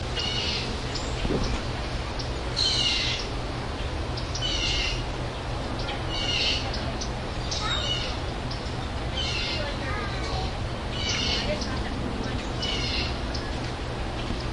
Louder ambient snippet recorded at Busch Wildlife Sanctuary with Olympus DS-40.
nature, animals, wildlife